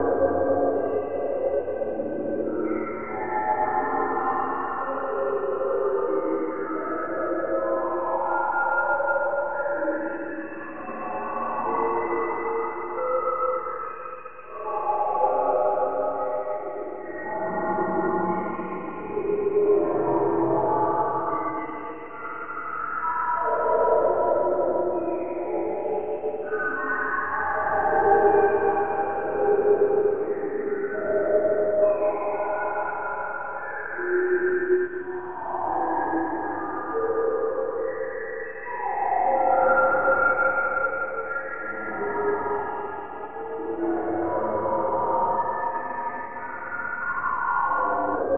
Horror Ambience (Loopable)
I recorded myself whispering and added effects on FL Studio.
This sound is loopable.
ambiance, atmo, haunted, loop, windy, horror, ambience, loopable, scary, creepy, dramatic, atmosphere, cinematic, FL-studio, rustling, background, ambient, wind, background-sound